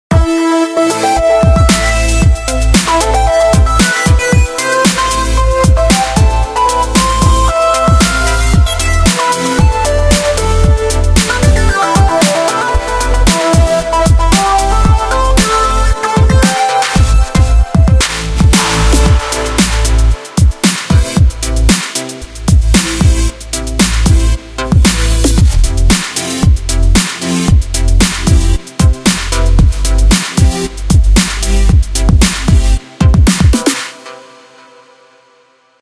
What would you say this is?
I Wish (loop)
electronic, hat, house, bass, limiter, kick, reverb, Fruity-Loops, synth, perc, snare, compression, fx, dubstep